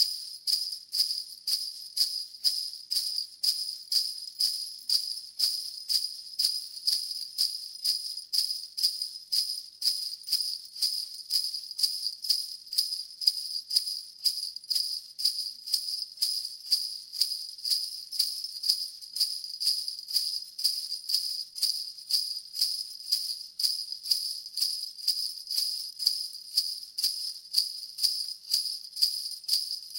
Sleighbells On Beat Phase Corrected
Stereo (large diaphragm condenser (SCM900) and dynamic (SM58) with slight phase adjustment. 122 bpm with tempo embedded with file from Presonus Studio One. Single 'beat' of the bells on tempo.